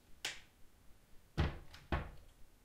Dresser door. Recorded with a Zoom H1.
sideboard, dresser, door, furniture